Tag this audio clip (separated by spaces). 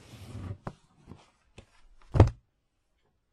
drop thump take floor set pull thud put-down book